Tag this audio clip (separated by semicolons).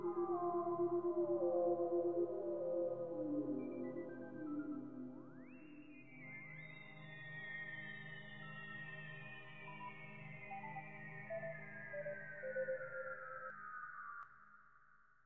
fi; sci